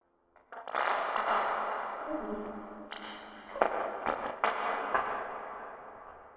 ns rubberarm
A created effect made from a creaky door to sound like a rubber man stretching his arms - abstract